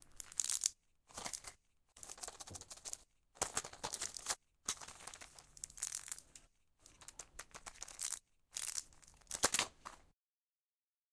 Pill packet handling: Foil crinkling, pill against plastic, shake. Soft sound, low reverb. Recorded with Zoom H4n recorder on an afternoon in Centurion South Africa, and was recorded as part of a Sound Design project for College. A packet of pain medication was used to record the sound